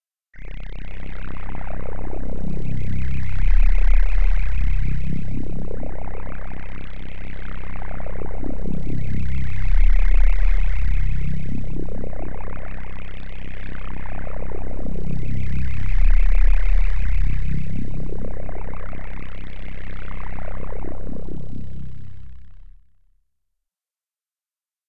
cine rotor6
made with vst instruments